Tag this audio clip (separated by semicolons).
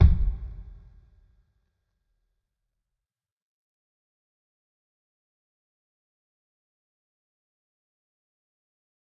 drum; god; home; kick; kit; pack; record; trash